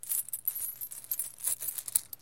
Coins Pouring 13
A simple coin sound useful for creating a nice tactile experience when picking up coins, purchasing, selling, ect.
Coin, Coins, Currency, Game, gamedev, gamedeveloping, games, gaming, Gold, indiedev, indiegamedev, Money, Purchase, Realistic, Sell, sfx, videogame, Video-Game, videogames